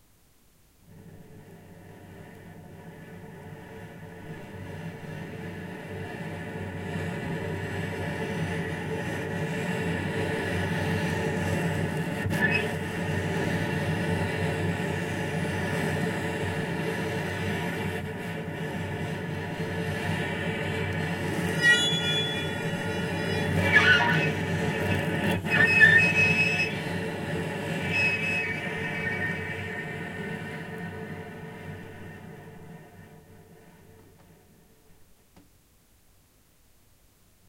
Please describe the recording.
cello played with the bow on the bridge and with muted strings (by the left hand) / variations in bow pressure and partials of the strings (sul ponticello) / crackling rosin / recorded at very close distance with Zoom H4N build in microphones
bow, bridge, cello, noise, alternative